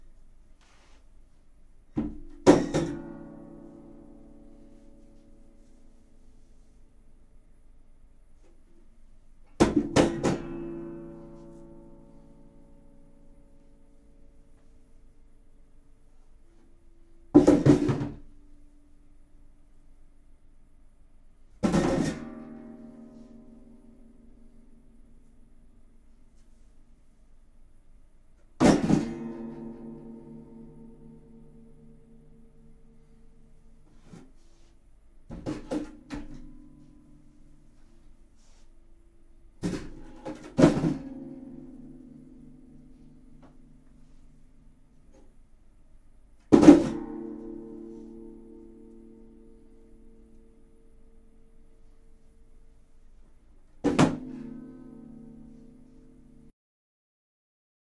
Guitar Drop
After hours of searching for the sound of a guitar hitting the ground, I resolved to just recording a quick piece. This is a small sized guitar hitting the ground a couple times on a carpeted floor (recorded in Logic Pro).
drop, guitar-drop